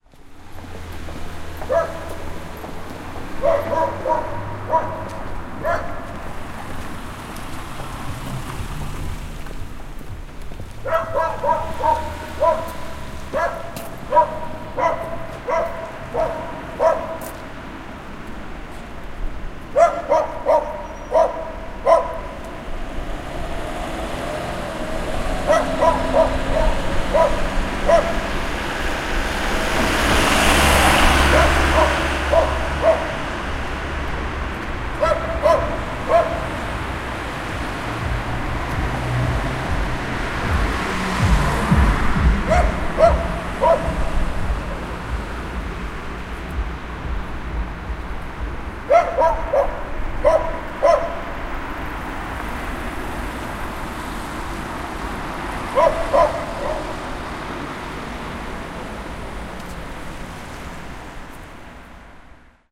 sunday wilda2 200311
20.03.2011: about.14.00. Wierzbiecice street in Wilda district in Poznan. sunday ambience with passing by cars and barking dog across the street (dog was tied to rail and waiting for it owner who has been doing shopping).
barking, dog, field-recording, pavement, people, poznan, wilda